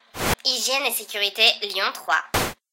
AVELINE Elodie 2013 2014 TalkieWalkie
/////// Made using Audacity :
Record my voice (Time: 02,298sec)
Effect : Change of speed (percent of change : -7)
Effect : Change height (percent change : +7)
Effect : Equalization 12 dB to - 12 dB
Effect : High pass filter (Cutoff frequency : 2500 Hz)
Effect : Normalize to -1,0 dB
Generate noise (white, amplitude : 0,8, time : 0,200 sec) at the beginning and the end of the voice
Effect : Cross fade in
Effect : Cross fade out
Effect : Low pass filter (Cutoff frequency : 1000 Hz)
//////// Typologie: Itération variée (V’’)
(début en tant que impulsion complexe (X’) )
////// Morphologie:
- Masse : groupe nodal
- timbre harmonique : acide, tonique, éclatant
- Grain : rugueux
- Allure : son énergique
- Dynamique : attaque franche
Profil mélodique : variation scalaire
Profil de masse / calibre : son couplé à du bruit
beep, communication, frequency, ignition, interference, noise, radio, ring, station, talkie, talkie-walkie-switching-on, tone, transmission, walkie